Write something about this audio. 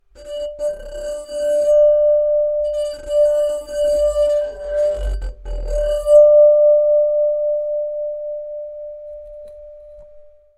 Corto Rugoso
flute, cling, clank, jangle, clink, violin, clang, wine, tinkle